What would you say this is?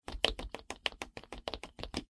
Quick Run - Cartoony
A remix of 'Shoes Running (1)' by Owlstorom
This sped up version was used for a comedy web series.
cartoon, footsteps, funny, run, rush